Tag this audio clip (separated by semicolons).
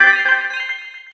game; intro; sfx